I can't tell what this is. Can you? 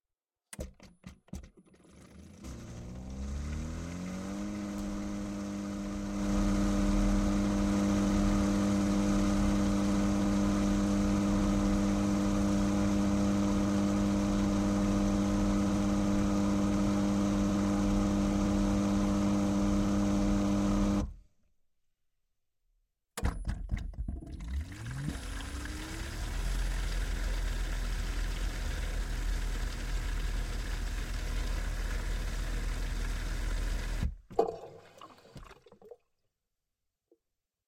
dishwasher start stop short
The short sound of a dishwasher, starting, pumping water in the machine and stoping.
Recorded with the Sound Devices MixPre-6 recorder and the Oktava MK-012 microphone.